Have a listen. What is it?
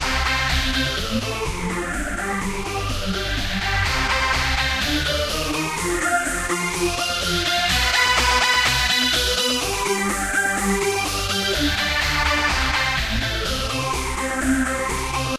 Trance Lead 125Bpm
a lead made using Garageband
125, 125bpm, techno, trance